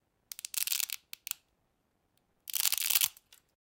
Spaghetti being snapped FOODIngr
Dry spaghetti being snapped.
bone, crack, food, FOODIngr, ingredients, OWI, snap, Spaghtetti, stick